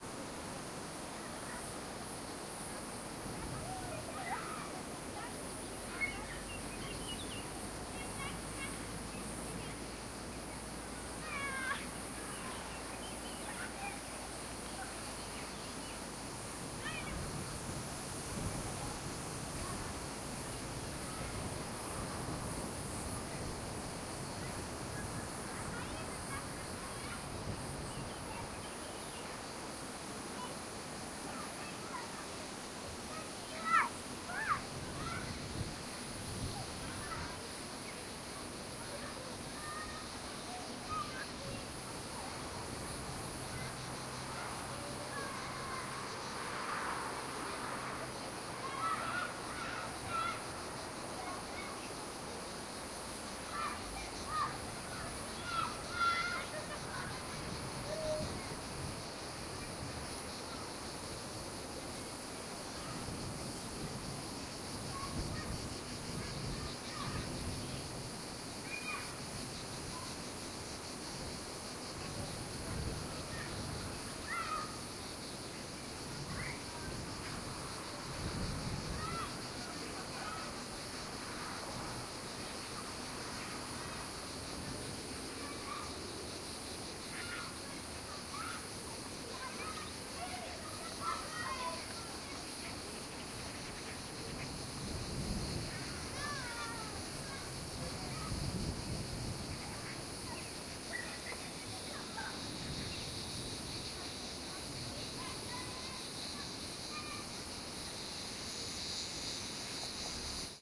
Children playing in fields of dry grass. From various field recordings during a shooting in France, Aubagne near Marseille. We call "Mistral" this typical strong wind blowing in this area. Hot in summer, it's really cold in winter.
air, aubagne, birds, children, cicadas, dogs, france, mistral, play, wind